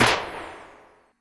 The Aggressives Snare Perc - Nova Sound
Clap, Aggressives, Drums, Hat, Perc, The, House, Sound, Bass, Hi, Loop, Drum, Snare, Nova, 4x4-Records, Hi-Hats, Kick, FX, Propellerheads